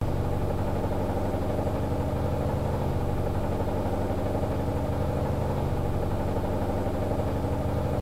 washing machine C (monaural) - Spin 4
Original 3s field recording pitch-shifted to remove pitch variation due to change in spin speed. Then three concatenated with fade-in/fade-out to create longer file. Acoustics Research Centre University of Salford